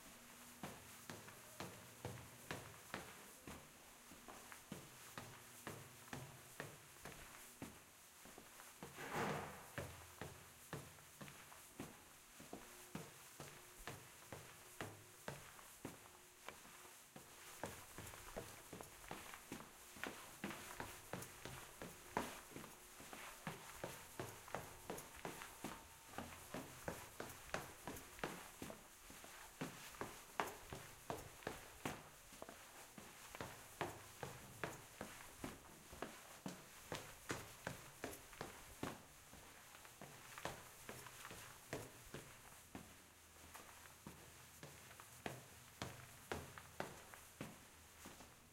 walk and run up stairs
walking and running upstairs in a concrete stairwell